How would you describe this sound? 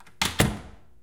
A wood door closes with latch